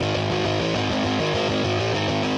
crunchy guitar riff